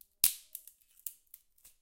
Twig Snap 02
Small twig being snapped in two. Recorded on a Zoom H4N using the internal mics.